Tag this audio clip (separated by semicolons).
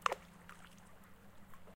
natural; nature; water